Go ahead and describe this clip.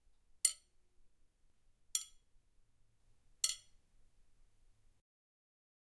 METLImpt Sai Weapon Foley Light, Strike
I recorded my Sai to get a variety of metal impacts, tones, rings, clangs and scrapes.